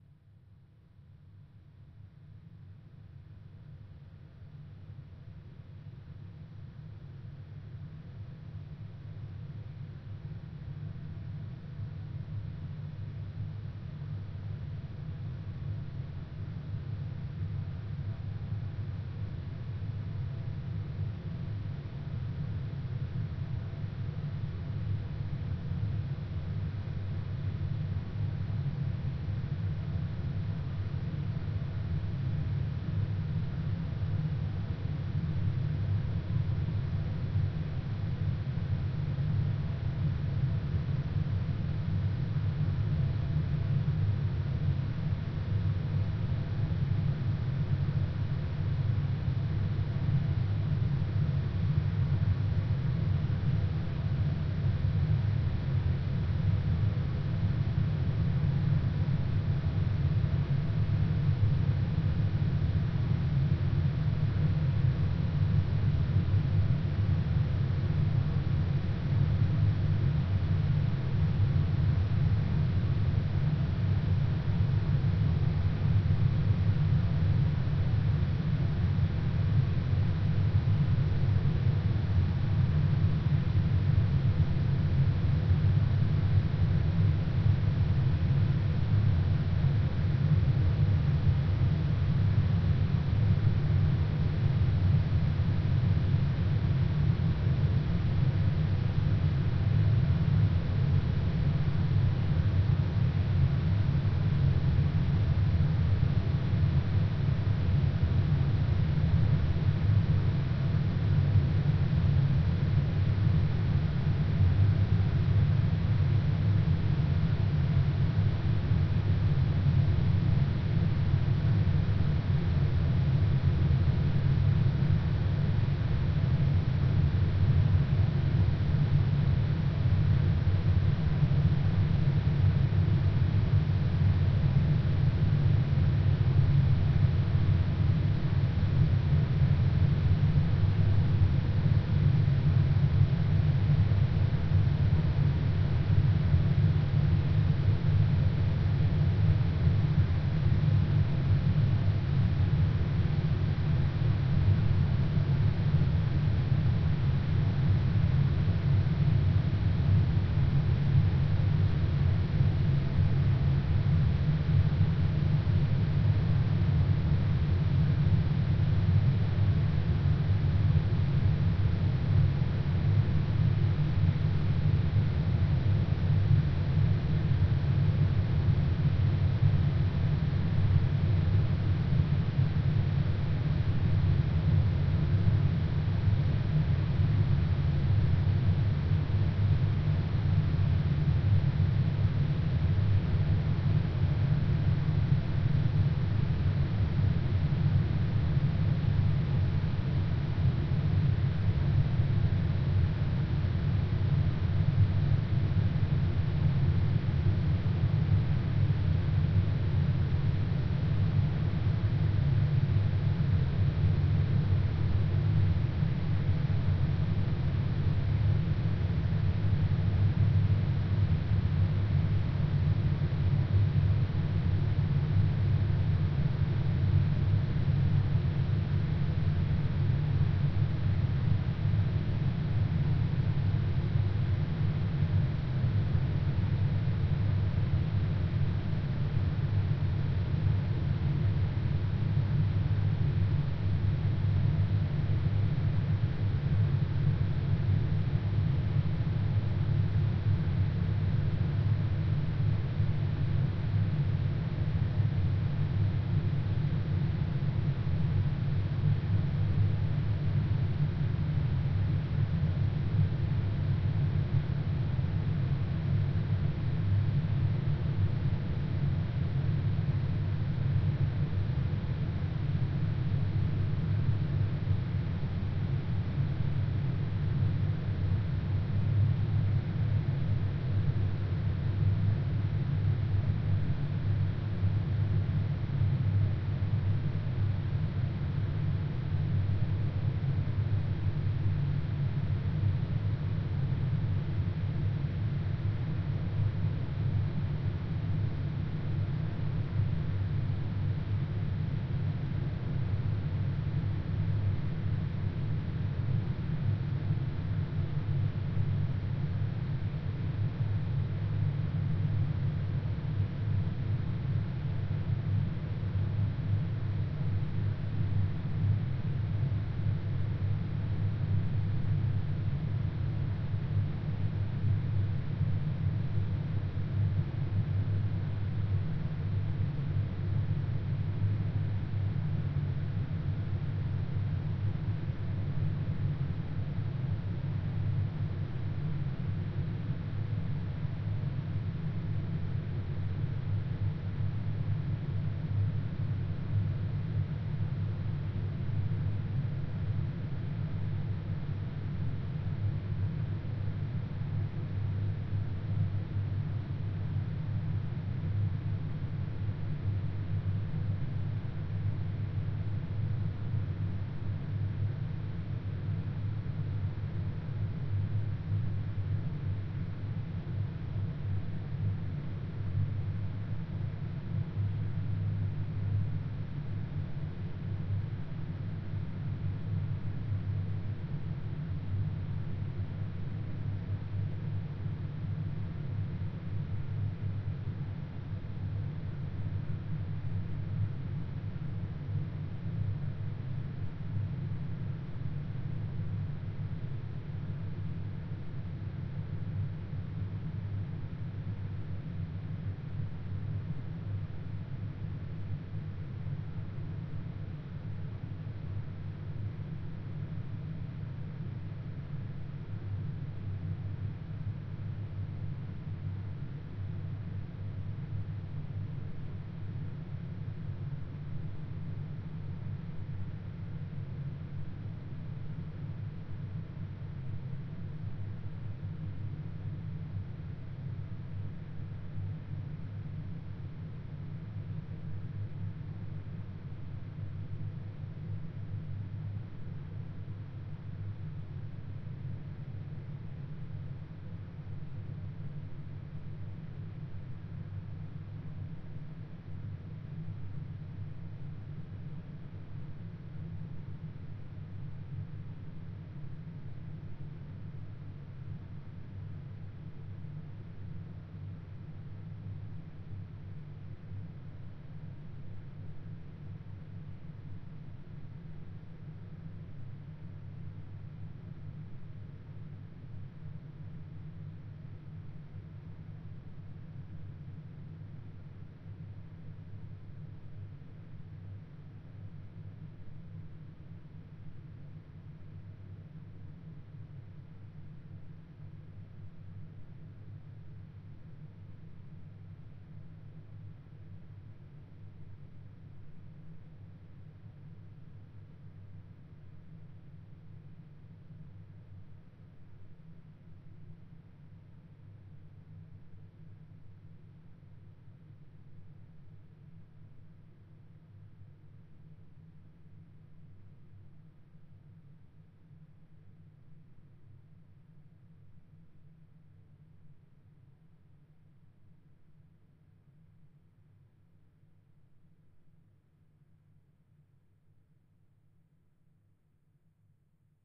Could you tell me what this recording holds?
Gust of Wind 8
Processed wind noise.
I slowed it down in Audacity.
air, ambience, blow, wind